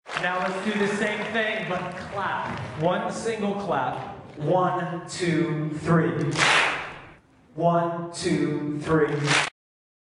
Single Clap1
One Single Clap